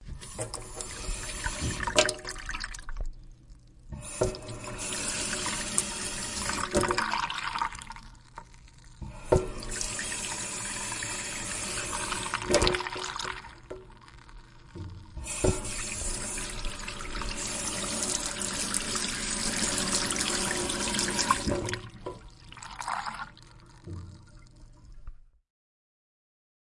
This sound is of a sink and running water draining. The faucet shuts and opens several times. Recorded with an Olympus LS-100.